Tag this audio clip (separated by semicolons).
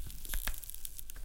ambient; natural; squeez